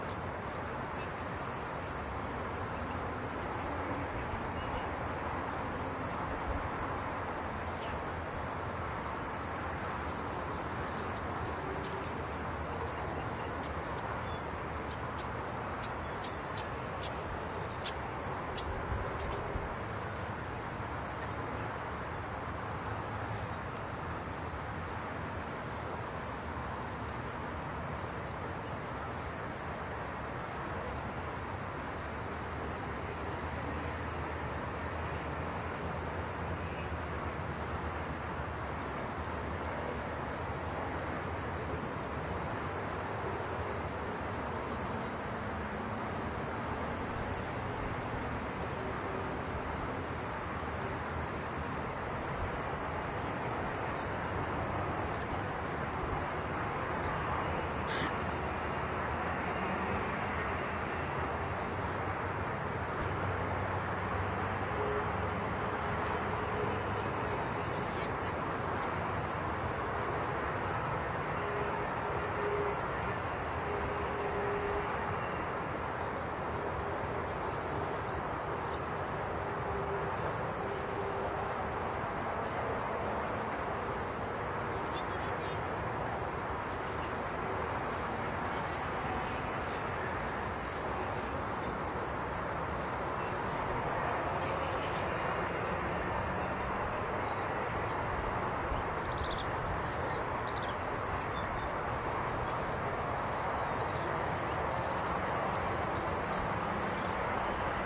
Omsk Victory park 3
Athmosphere in the Victory park, Russia, Omsk. Hear birds, chirping of insects and noise of cars from nearby highway.
XY-stereo.
Omsk, Russia, victory-park